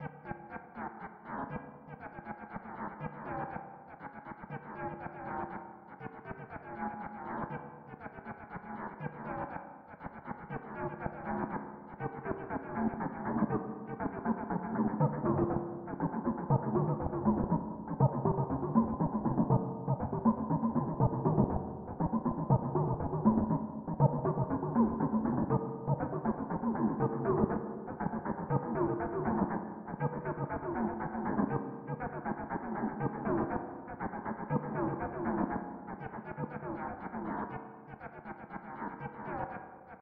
A strange rhythmic sound. I have not saved any presets or made any records. I do not remember how the sound was created. I think it was most likely made in Ableton Live.
This pack contains various similar sounds created during the same session.
rhythmic, synthetic, delay, echo, synthesized, strange